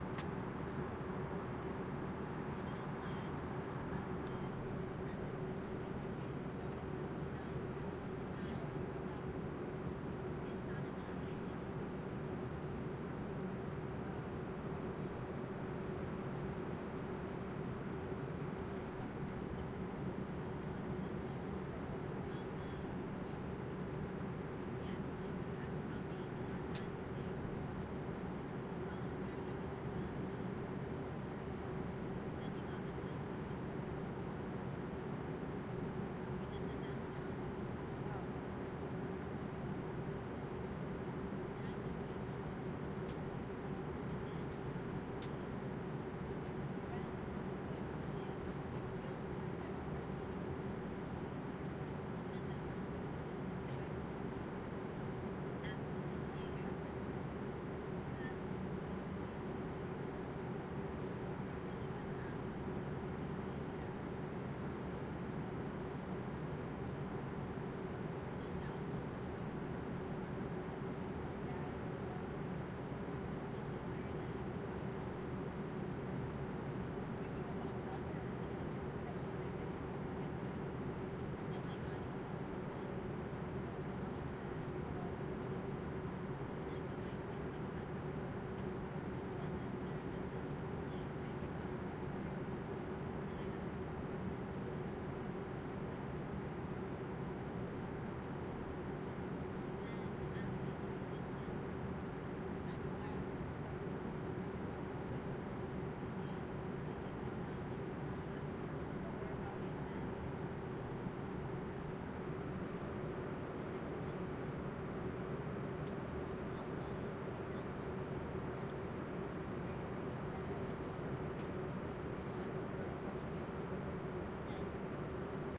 front ST int idling plane amb english voice
interior of idling plane with lowlevel english walla
front pair of H2 quad surround recording
english, idle, surround, airplane, quad